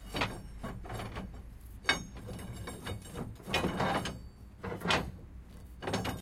Metal handling bars in container 1
Metal handling bars in container
handling, container, Metal, bars